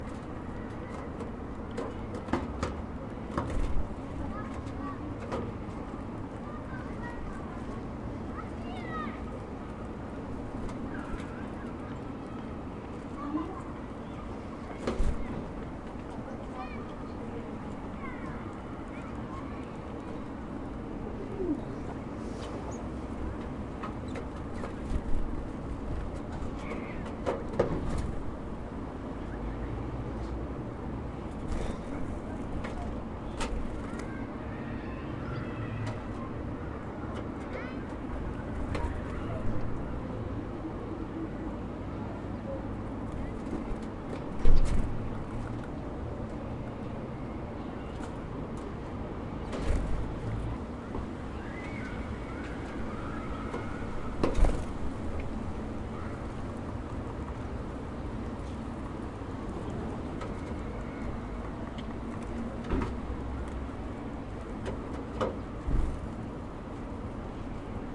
Tits fly on the window sill, grab chips and fly away.
Recorded: 2010-10-21.
tomtit, noise, city